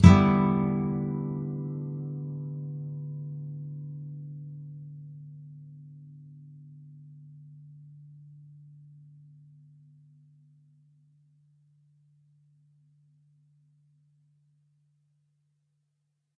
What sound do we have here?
Open strs
These are open strings that some play during a chord change. Open E (1st), B (2nd), G (3rd), and D (4th). If any of these samples have any errors or faults, please tell me.